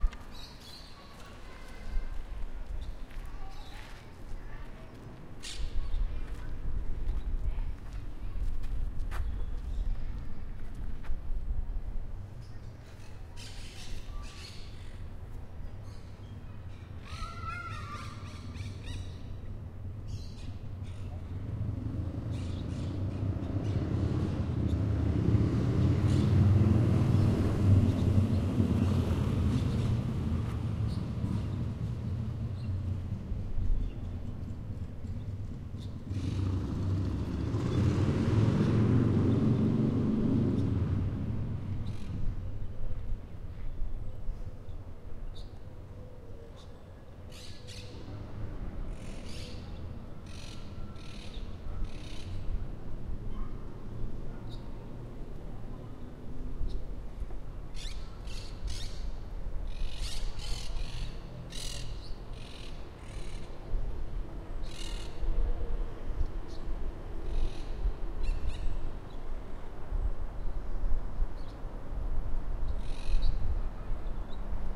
Calidoscopi19 Atrapasons Congres Esglesia San Pio
Urban Ambience Recorded at Esglesia San Pio in April 2019 using a Zoom H-1 for Calidoscopi 2019.